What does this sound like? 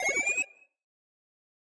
I made this for a secret agent type game in Sound Design class
warning, emergency, sirens, klaxon, horn, alarm, siren, alert